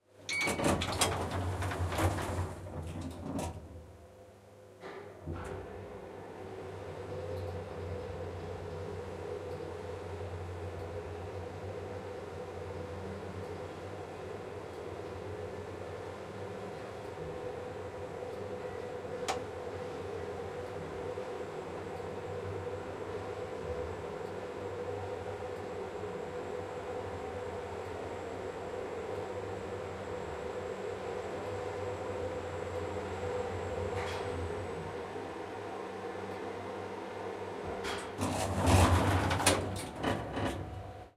elevator inside press button doors close go up eight floors doors open
Ambient recording inside an elevator in an apartment building. A button is pressed, the doors close and the elevator goes up eight floors. It stops and the doors open.
Recorded with the Zoom H4n.
floors, close, lift, go, press, ambient, building, apartment, up, elevator, door, button, field-recording, open